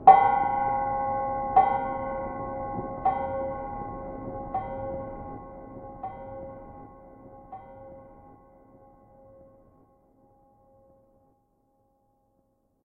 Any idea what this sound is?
57657 Church Bell
Another outtake from a different recording of me hitting some different objects together. This the base of a mini x-mas tree. Sounds like a church bell.
boom, bells, bell, gong, church